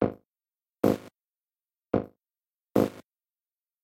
8bit loop
Loop of short noisy bitcrushed bass notes at 125 beats per minute.